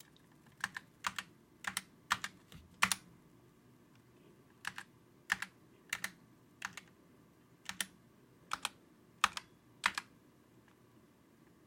Typing Slow A01
John Gomez Single key presses on a MX Cherry silent
Typing, key, MUS152, slow